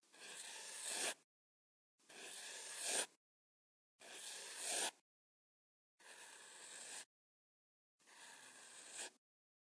marker lang streep
drawing, marker, pen, pencil